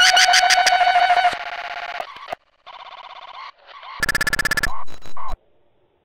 Mike Snue
these are some rEmixes of hello_flowers, the ones here are all the screaming pack hit with some major reverb
cut in audacity, tone and pitch taken down and multiplied compressed,
and run through D.blue Glitch, (mainly a stretcher a pass a crush and
then a gate etc.) There are also some pads made from Massive.